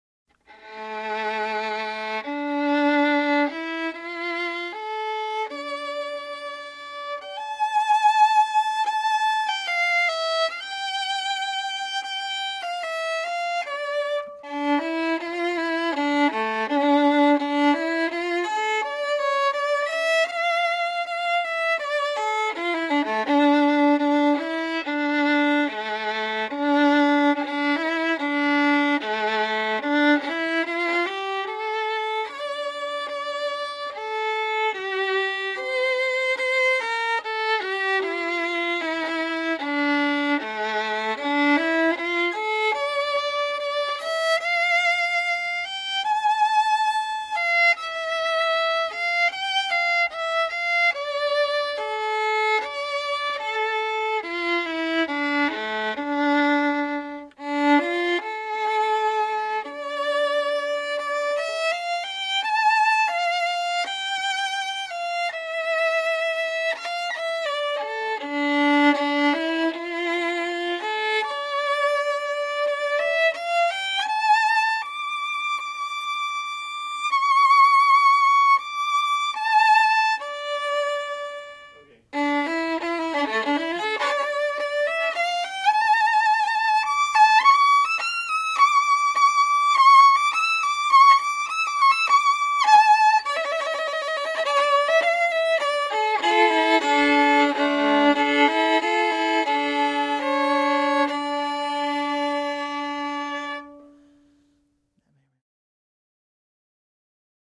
gypsy violin variation
Violin solo. A short impression of a gypsy tune - using a variation of "The Streets of Cairo" to riff off of. Played by Howard Geisel. Recorded with Sony ECM-99 stereo microphone to SonyMD (MZ-N707).
folk, music, solo, traditional, violin